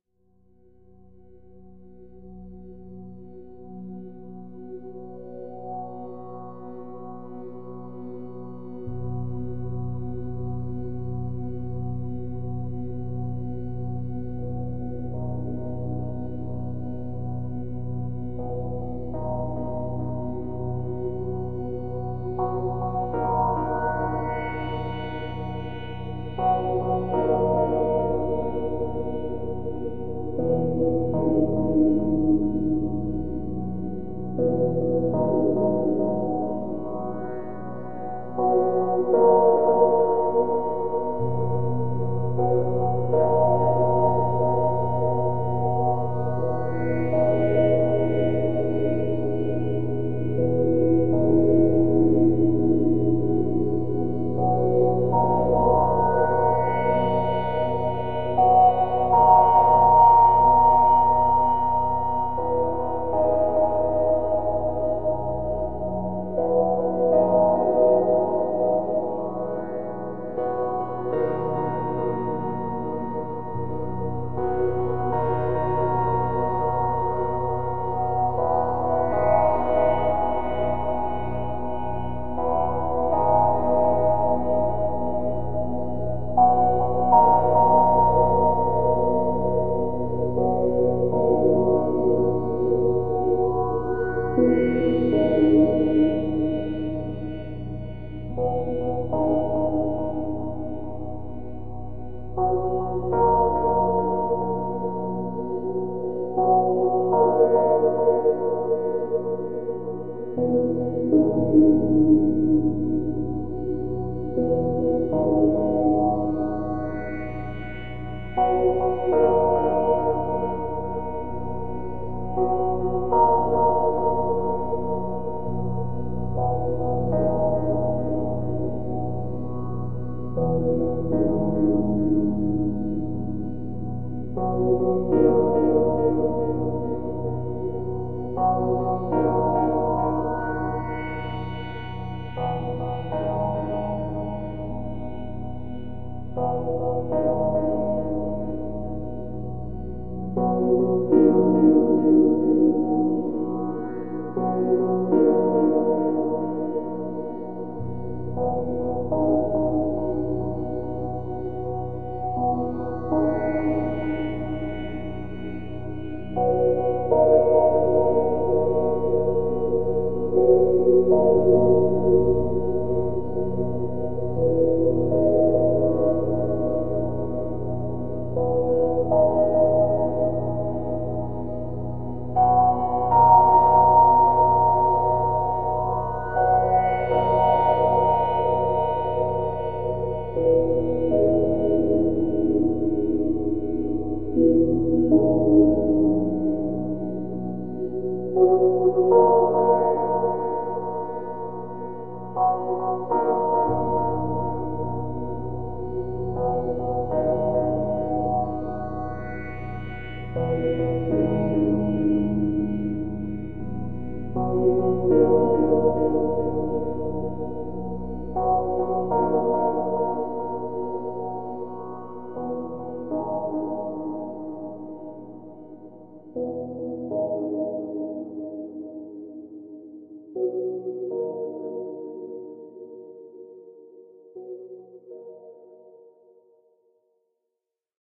A Song From Father To Son
analog, relaxing, slow, smooth, spacey, synthesizer